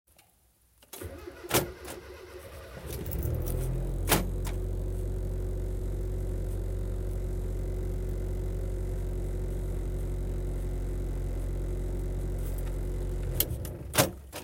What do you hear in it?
encender carro prender
carro, encender, prender